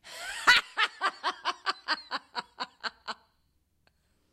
Woman laughs
Sony ECM-99 stereo microphone to SonyMD (MZ-N707)
environmental-sounds-research, female, laugh, human